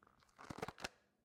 Super poder de estirarse
elastico, estirar, resorte